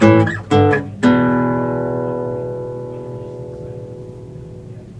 YVONNE Guitar powerchords
The sound of power chords on a guitar.
chords, Guitar, power, Strings